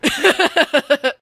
more laughing
Do you have a request?
female, laugh, voice